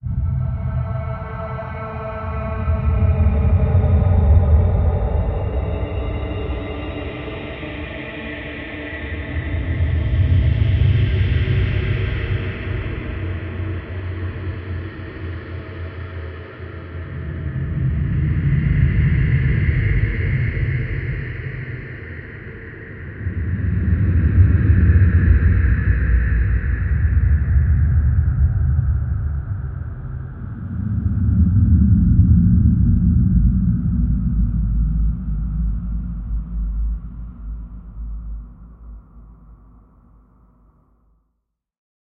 starting to better understand these very deep swooshes and film-like sound effects, first time i ever got purple on my waveform! hell yeah. bass. lol.
ambiance, ambience, ambient, atmosphere, bass, breath, dark, deep, delay, drone, echo, effect, electronic, experimental, fx, horror, long-reverb-tail, noise, pad, processed, reverb, sci-fi, sound-design, sound-effect, soundeffect, soundscape, technique, thunder, vocal, voice